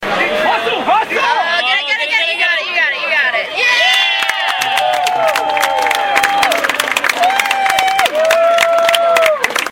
Yelling for someone to catch a ball.
claps, yelling, baseball